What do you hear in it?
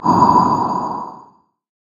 Computer or Mobile Chat Message Notification
blow, bright, dust, glass, lsd, ornament, short, wind